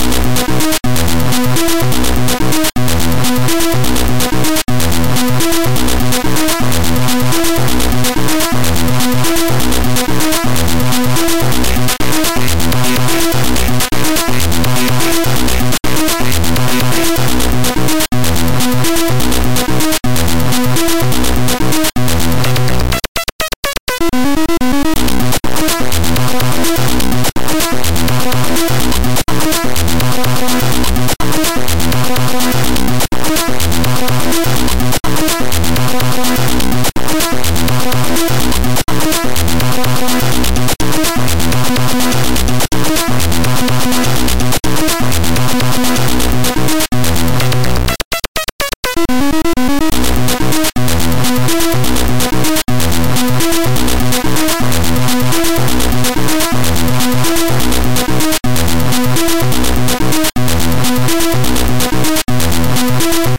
8bit intro.. To something...